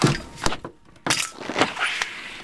Opening my attic's window 2. Recorded with Edirol R-1 & Sennheiser ME66.